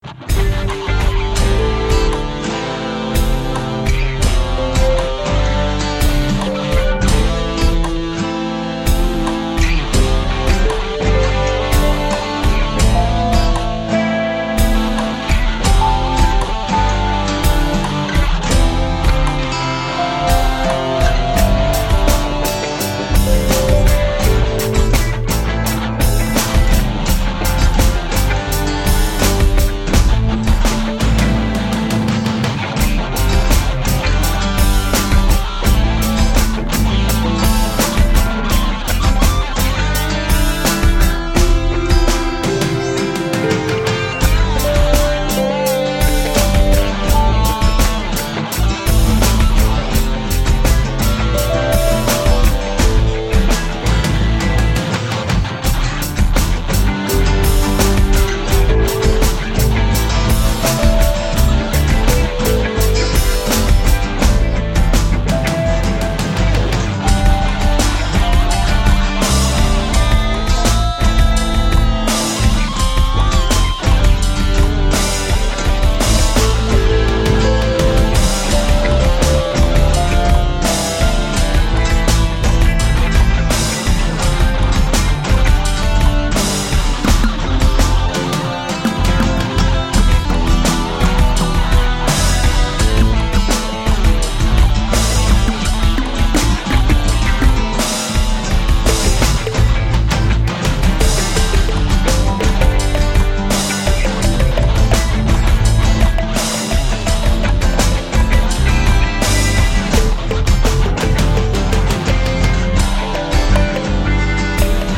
Easy Flow Rockaloop 84BPM
A short clip from one of my Original Compositions.
Equipment used: Audacity, Yamaha Synthesizer, Zoom R8 Portable Studio, Hydrogen and my gronked up brain.
BPM, Electro, Country, Music, EDM, House, Audio, Clips, Jam, 85, Blues, Beats, Rap, Keyboards, Traxis, Original, Rock, Dubstep